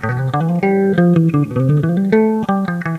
Various settings of mic sensitivity and USB setting.
doodling, usb, test, guitar